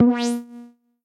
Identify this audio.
Transition sound from one screen or menu to another, could be used for game sounds.